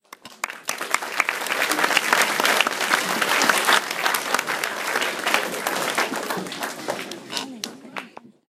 Large crowd applause sounds recorded with a 5th-gen iPod touch. Edited in Audacity.
clapping, cheer, applause, crowd, clap, people